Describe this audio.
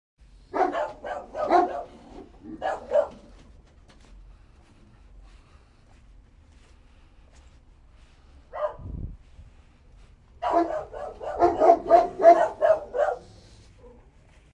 May 5 2022 111255 PM dogs barking
Auidio of dogs barking from a car at night recorded from sidewalk
barking, dog, pets, dogs, bark, barks, growling, dogs-barking